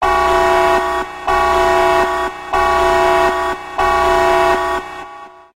Red Alert Nuclear Buzzer
This sound effect was created on a Korg keyboard and later modified in Audacity. enjoy!